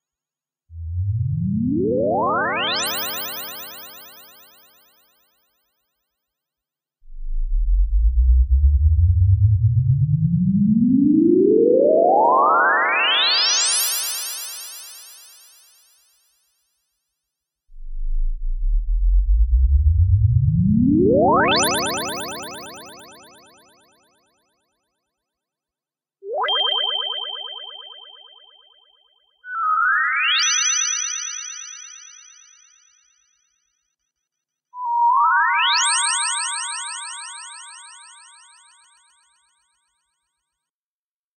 Sci Fi Wooshes ascending 02
3/5 - a retro sounding warp/woosh sci-fi sound effect with delay.
up, underwater, delay, space, sfx, digital, whoosh, future, sound-effect, sci-fi, woosh, effect, soundesign, ascending, magic, warp, synth, fx, electronic, TV, retro